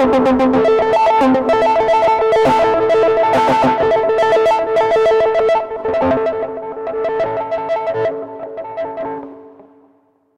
Sounds produced tapping with my finger nail on the strings of an electric guitar, with lots of distortion applied. Recording was done with an Edirol UA25 audio interface.
anger, distortion, guitar, strings